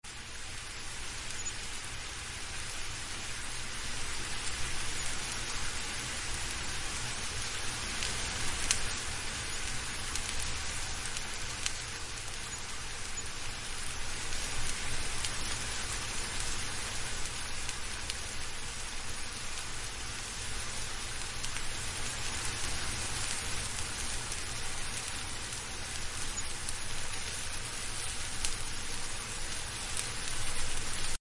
The sound of cooking on a stove top